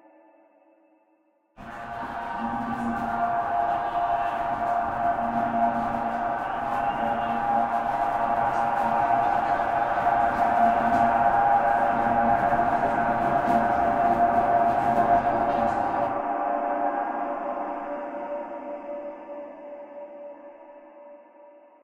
LAYERS 002 - Granular Hastings is an extensive multisample package containing 73 samples covering C0 till C6. The key name is included in the sample name. The sound of Granular Hastings is all in the name: an alien outer space soundscape mixed with granular hastings. It was created using Kontakt 3 within Cubase and a lot of convolution.